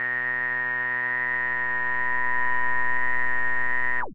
Multisamples created with subsynth using square and triangle waveform.
multisample, square, subtractive, synth, triangle